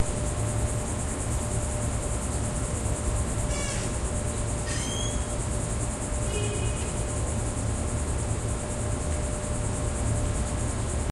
SonyECMDS70PWS work garage
ambience, field-recording, microphone, electet, digital, test